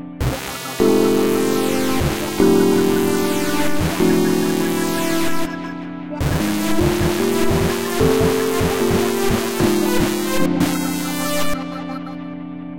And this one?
Horribly distorted horn sound